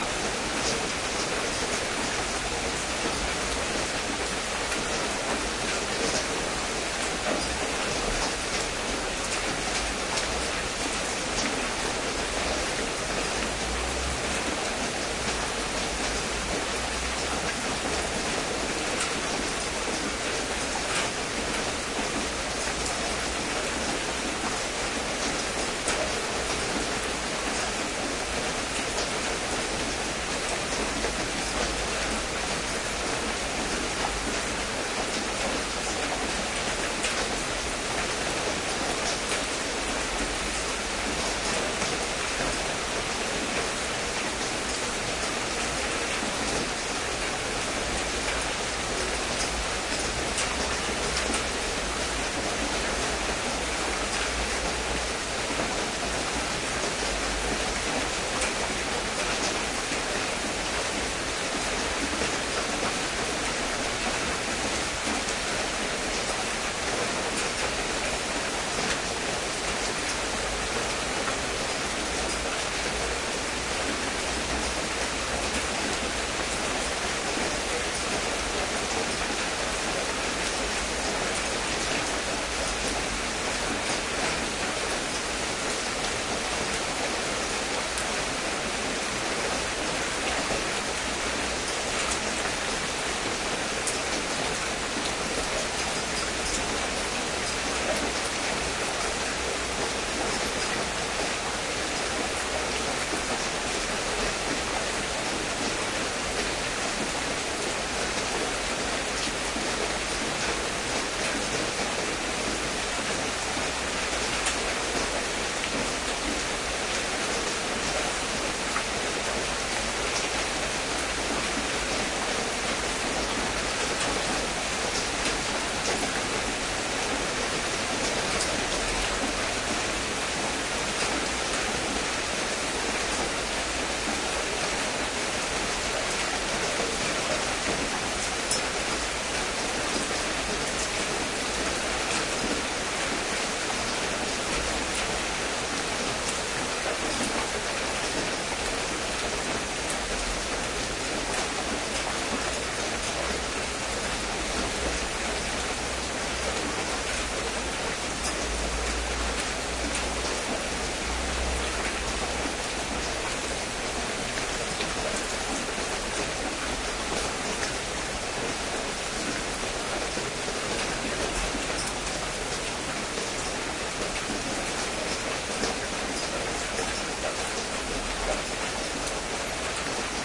heavy rain
Same location then the following track, this time the rain being heavier. Olympus LS-10.
field-recording, rain